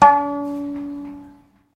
Plucking the D string on a violin.